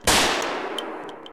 FN Five-seveN shot
FN Five-seveN firing a live round, moderate echo.
field-recording, five-seven, gun-shot, pistol, shot